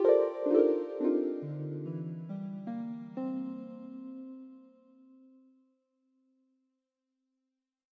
Soft Harp Intro
The intro of the elf tavern!
Recorded with my keyboard on LMMS.